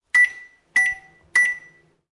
Microwave oven beeps
Simple beeps from microwave oven.